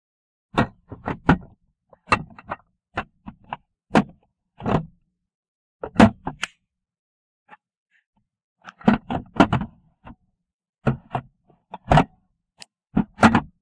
Store Cans Clunking
ambience, can, checkout, clink, clunk, cooling, crinkle, food, produce, store